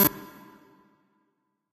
Sine waves processed randomly to make a cool weird video-game sound effect.